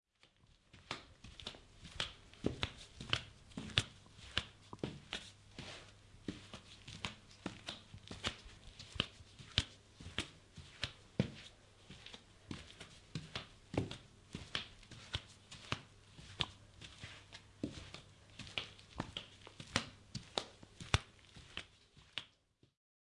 06-Man walking flip flops
Man walking with flip flops
Czech, flip-flops, man, Pansk, Panska, step, steps, walking